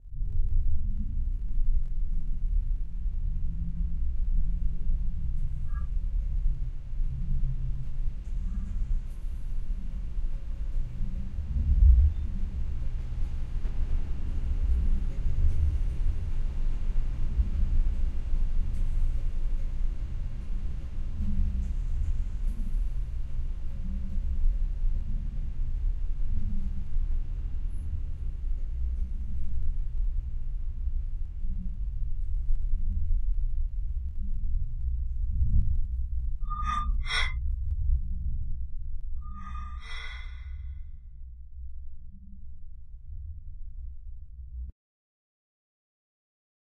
s egg drop soup
Low frequency musical sequence with high frequency processed information.